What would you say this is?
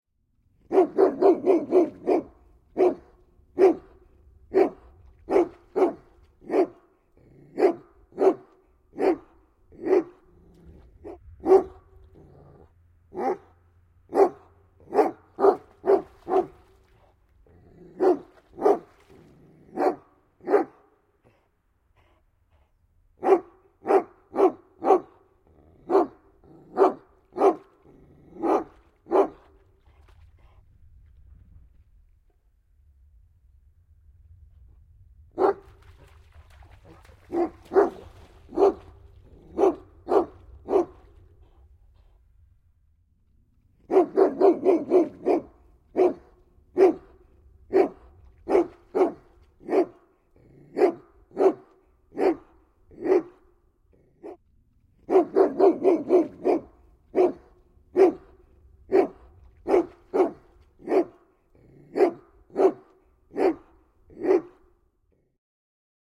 Koira haukkuu / Dog barking, a big dog, exterior (Irish wolfhound)
Iso koira haukkuu ulkona. (Irlanninsusikoira).
Paikka/Place: Suomi / Finland / Vihti, Vanjärvi
Aika/Date: 28.04.1990